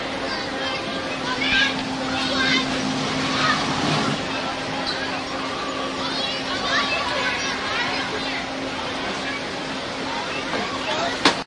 newjersey OC ferriswheelground
Underneath the ferris wheel at Wonderland Pier in Ocean City recorded with DS-40 and edited and Wavoaur.
ocean-city, field-recording, wonderland, ambiance